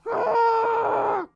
Muffled scream MWP
Screaming into a pillow